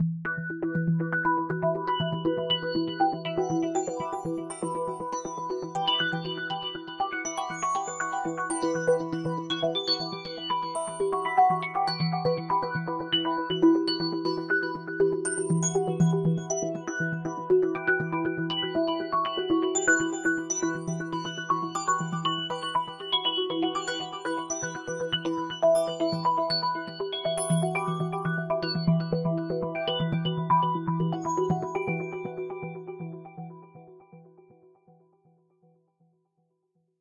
Electro sample.
Created with a sequencer,
I composed the MIDI track.
Date : 2011/05/17
Location: Cesena, Italy.
electro, electronic, music, sample